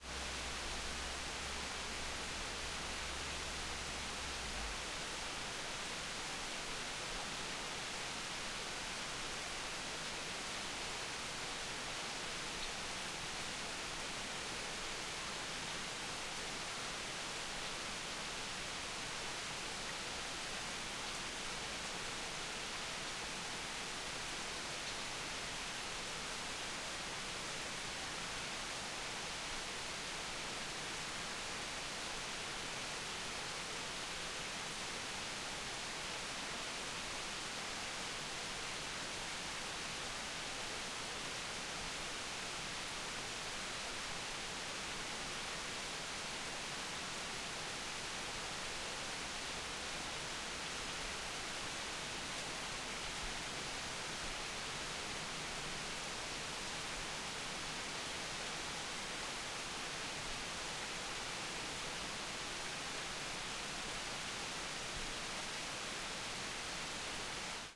Ambi - Small stream - more distance - Sony pcm d50 stereo Recording - 2010 08 Exmoor Forrest England
Ambi, ambiance, exmoor, forrest, small, stereo, stream